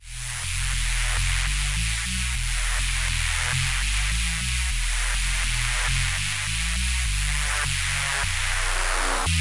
biggish saw synth e e g b 102 bpm-27
biggish saw synth e e g b 102 bpm